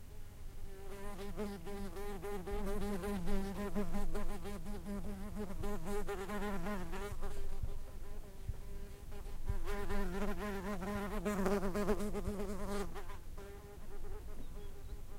a wasp flies around the mic. Rode NT4 > Shure FP24 > iRiver H120(rockbox) /una avispa alrededor del micro
donana, field-recording, hornet, insects, nature, scrub, summer, wasp